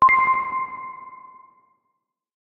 UI Confirmation Alert, B4
Experimenting with the Massive synthesizer, I created some simple synths and played various high pitched notes to emulate a confirmation beep. A dimension expander and delay has been added.
An example of how you might credit is by putting this in the description/credits:
Originally created using the Massive synthesizer and Cubase on 27th September 2017.
alert, beep, bleep, button, click, confirmation, game, gui, interface, menu, ui